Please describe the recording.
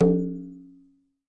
LP Congas Tumbadora Open
These Bongo and Conga Drum single hits were recorded with a Zoom H5 in stereo with a mono shotgun overhead panned to the left and an Audix D4 panned to the right.
Single, Tumbadora, Conga, Hits, Drums